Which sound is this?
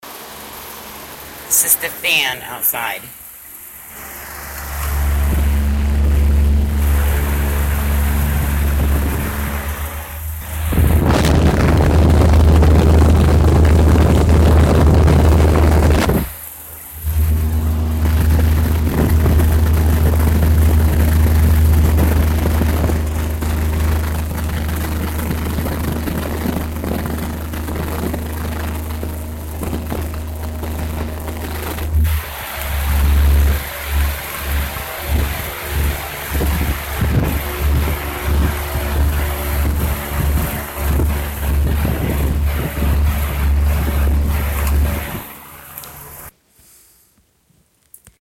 This is a very large fan with metal blades, recording at various places, both close to the blades and close to the actual motor.
air, ambience, ambient, atmosphere, background, background-sound, blow, blowing, fan, general-noise, howling, motor, noise, vent, wind, windy